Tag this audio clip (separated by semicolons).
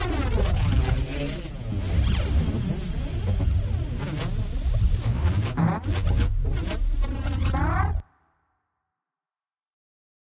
effect; experiment; film; fx; soundesing; suspense; tense; thrill; transformers